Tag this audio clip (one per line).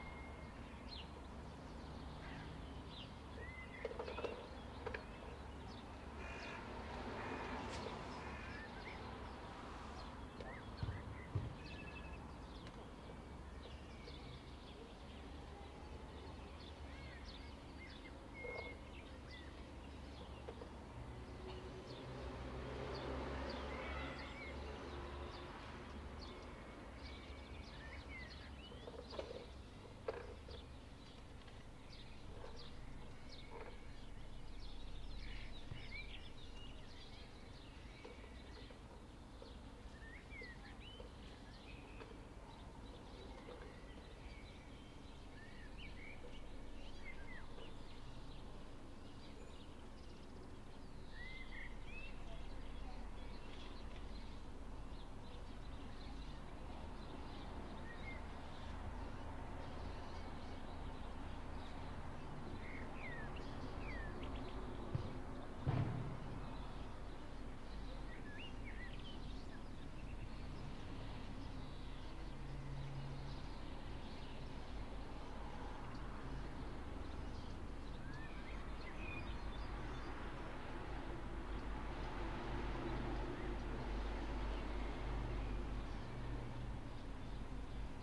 background
Birds
Cars
Spring